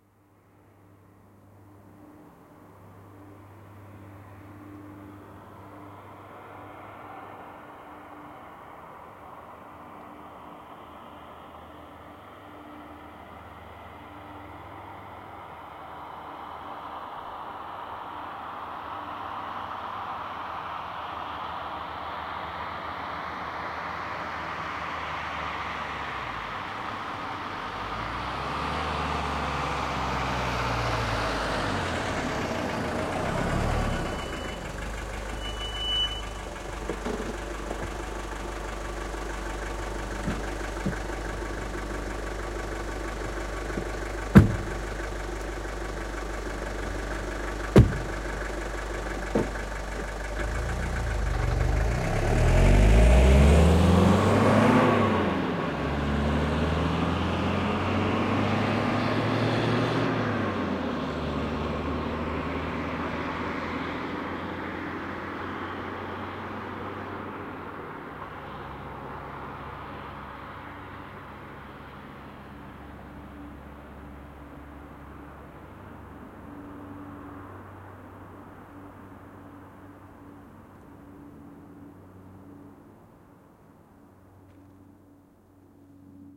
Mercedes Benz 200 D, taxi approaches, stops, passenger leaves, taxi leaves // Mercedes Benz 200 D taksi lähestyy, pysähtyy, matkustaja poistuu, taksi lähtee
Mercedes Benz 200 D, mersu. Lähestyy, pysähtyy, tyhjäkäyntiä, matkustaja jää kyydistä, auton ovet, auto lähtee ja etääntyy.
Paikka/Place: Suomi / Finland / Vihti
Aika/Date: 1985
auto, car, diesel, diesel-auto, field-recording, finnish-broadcasting-company, Mecedes-Benz, taksi, taxi